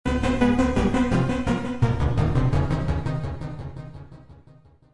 A creepy/sneaky diminished digital short scale created with Pocket Band for Android